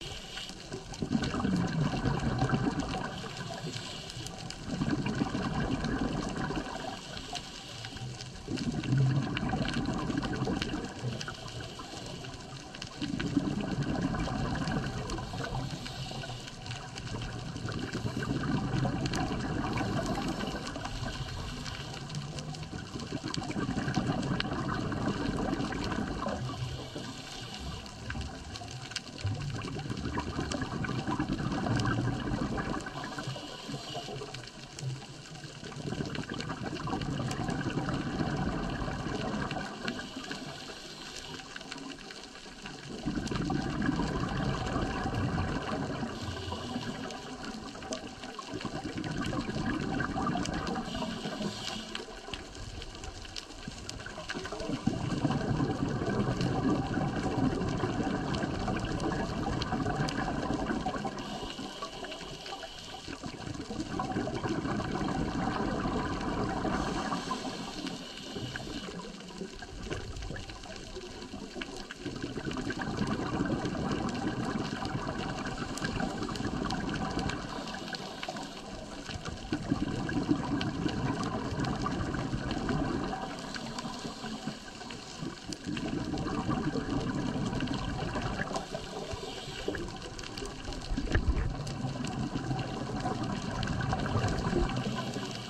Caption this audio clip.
Scuba bubbles
Recorded during one of my scuba diving trips.